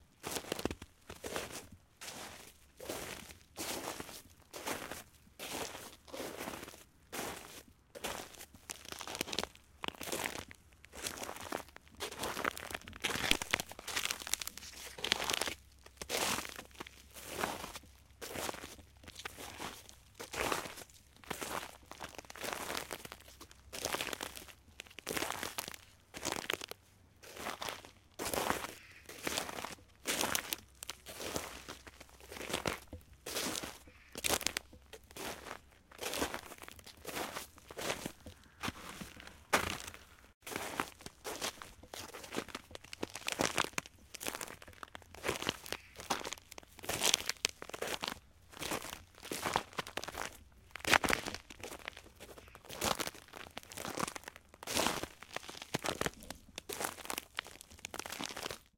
footsteps-snow-ice
Recorded with zoom 5 and sennheiser mk600 walking in icy snow foley footsteps walk foot
field-recording, snow, ice, footsteps